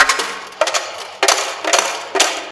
MICHEL Elisa 2017 2018 Pieces
I recorded the sound of pieces in a coffee machine, and created a loop. I reduced the noise, add some reverberation.
Ce son est une itération variée (V"). Il est nodal, le timbre est terne, grave. Le grain est rugueux, l’attaque est plutôt forte. Le profil mélodique est composé de variations scalaires, le profil de masse est un profil « site ».